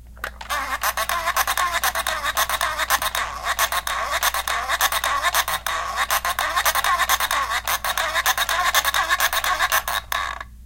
fpphone st rollclose 1
Toy phone makes squawking sound as it rolls along, recorded with mics attached to toy. Varying medium speed.
stereo, toy